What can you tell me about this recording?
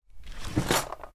34 draw open
taken from a random sampled tour of my kitchen with a microphone.